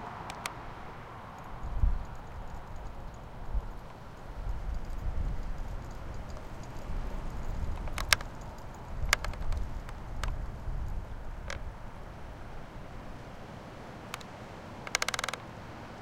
some wind feedback but nice close creaks from inside a dried out tree. There is also some rattling leaves nearby.